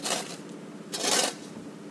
Machine loop 11
Various loops from a range of office, factory and industrial machinery. Useful background SFX loops
factory, print, machine, office, sfx, loop, industrial, plant, machinery